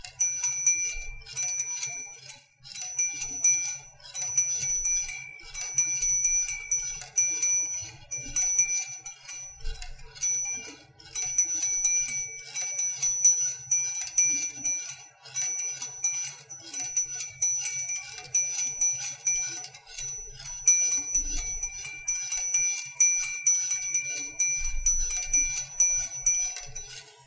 A Christmas Angel Chimes sound, to spice up any Christmas Eve